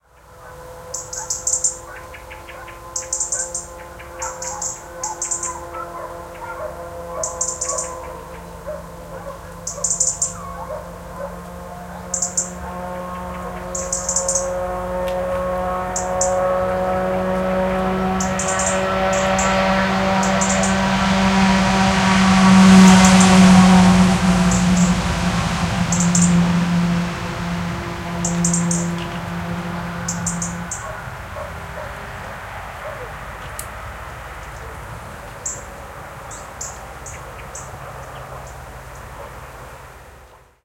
20181231 passing.bike
Bike passing very fast, bird tweets in background. Recorded near Aceña de la Borrega (Caceres province, Extremadura, Spain). EM172 Matched Stereo Pair (Clippy XLR, by FEL Communications Ltd) into Sound Devices Mixpre-3 with autolimiters off.